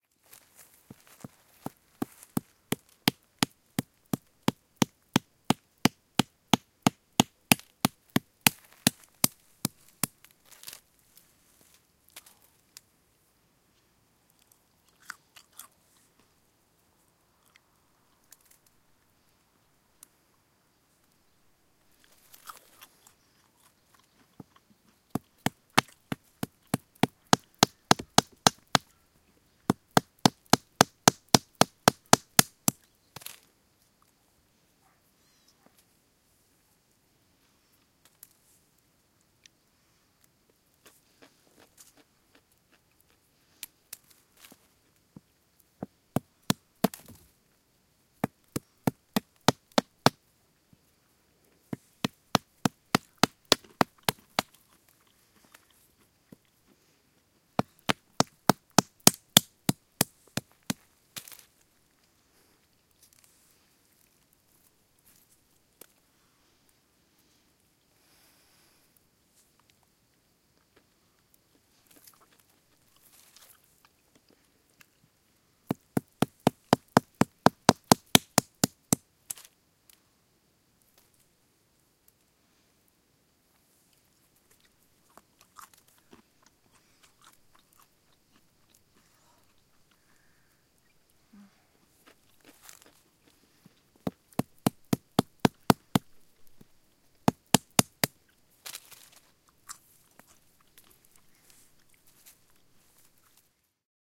Cracking almonds - mv88
Cracking almonds with a stone and eating them in the countryside in Marata. Recorded with my mobile phone with a Shure mv88 on July 2015. This sound has a matched recording 'Cracking almonds - h4n' with the same recording made at the same exact place and time with a Zoom h4n.
cracking, nature, stone